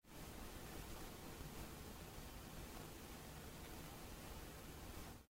MUS152 No signal TV sound 1 Thy Nguyen
MUS152 No signal TV sound_1 Thy Nguyen
TV, sound, MUS152